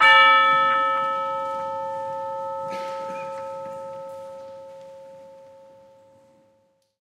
TUBULAR BELL STRIKE 002
This sample pack contains ten samples of a standard orchestral tubular bell playing the note A. This was recorded live at 3rd Avenue United Church in Saskatoon, Saskatchewan, Canada on the 27th of November 2009 by Dr. David Puls. NB: There is a live audience present and thus there are sounds of movement, coughing and so on in the background. The close mic was the front capsule of a Josephson C720 through an API 3124+ preamp whilst the more ambient partials of the source were captured with various microphones placed around the church. Recorded to an Alesis HD24 then downloaded into Pro Tools. Final edit in Cool Edit Pro.